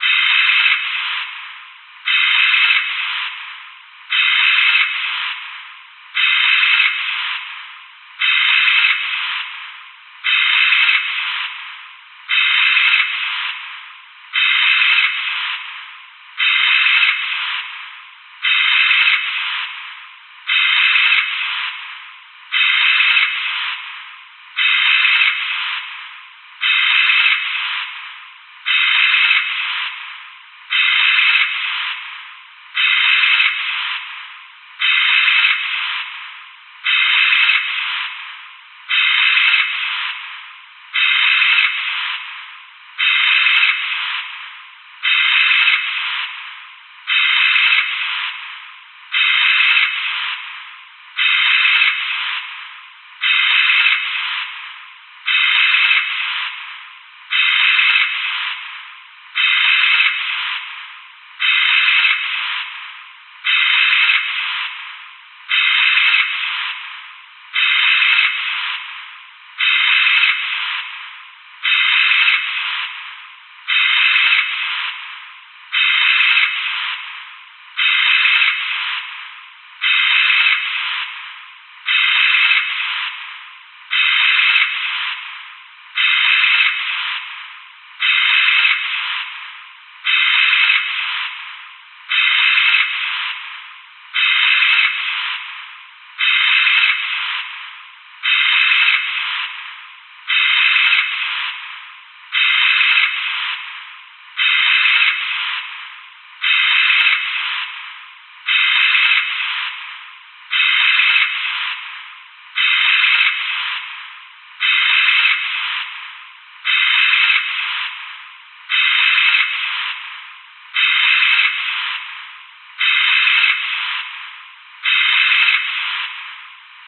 A foley of someone breathing through a respirator or gas mask at 40 breaths per minute